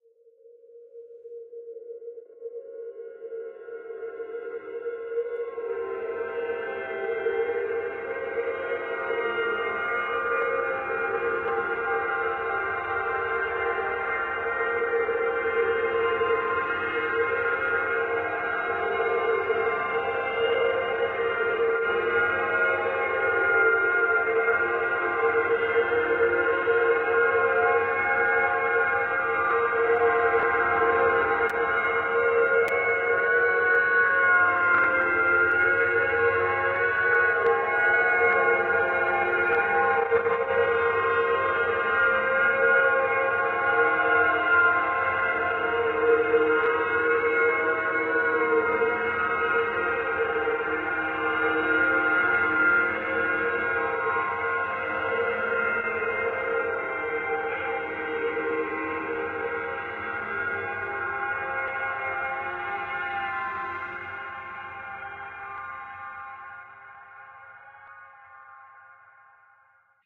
Made by carefully abusing layered piano samples in Audition.